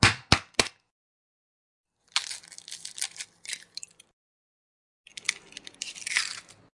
3 taps on a wooden counter - cracking the egg into a cup - shell noise.